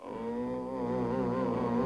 Droning sample of male vocals from a live noise music recording